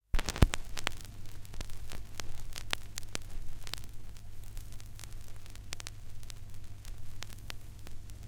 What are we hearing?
Putting on the turntable with vinyl noice. Recorded with ZOOM H1.